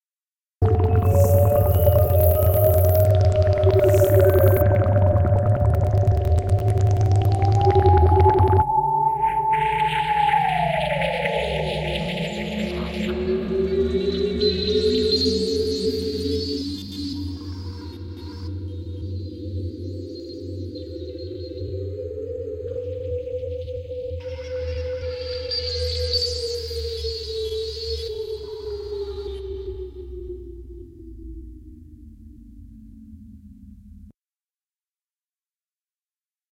alien soundscape/ multisamples
alien, space-ships, sf